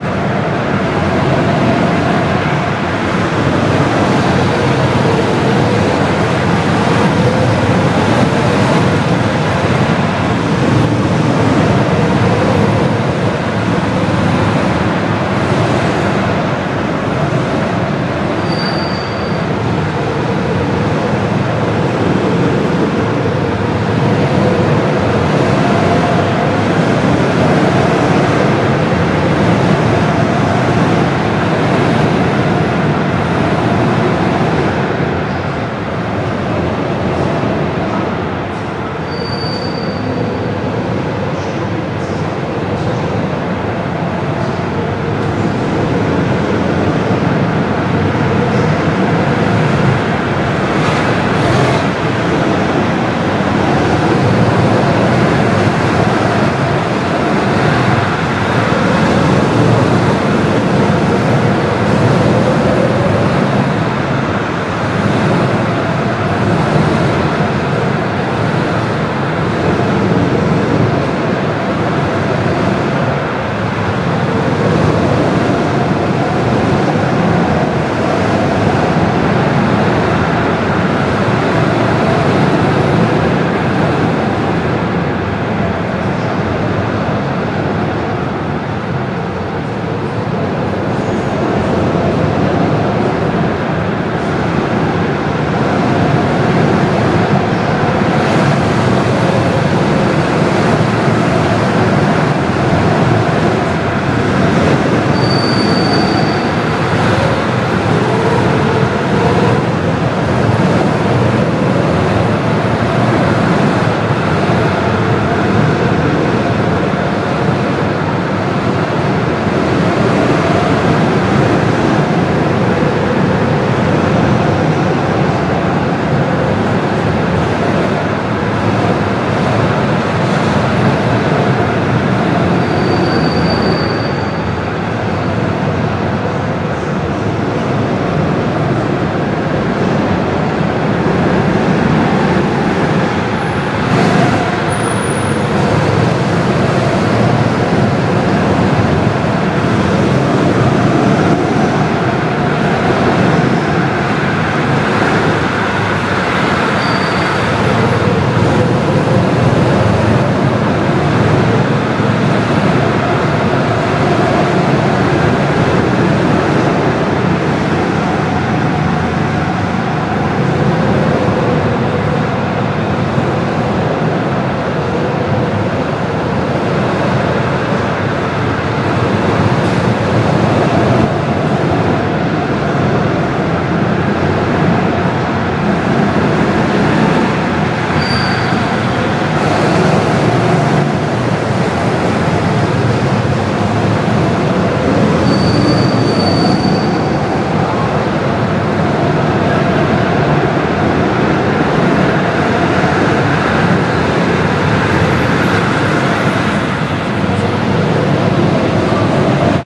Sounds in an in-door go kart racing place.
Recorded with iPodTouch 4G. Edited with Audacity.
go-kart, slide, engine, racing, motor, automobile, tyres, tires, berlin, driving, vehicle, engines, vehicles, squeak, motors, kart, drive, race, tire, gokart, skid, fun, squeaking, skidding, go-cart